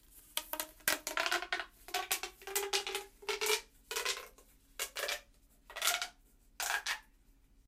One of those bendy straw tube things
bendable, bendy, hollow, noise-maker, pop, popping, snaps, toy, tube